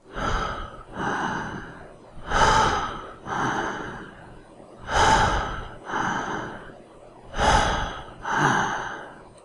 Male Slow Breathing 01
man, breathing, human, slow